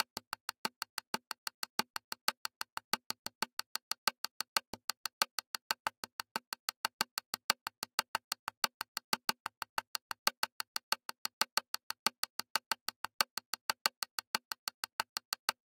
ticky tick-tock percussion loop like a light rhythm played on the rim of a drum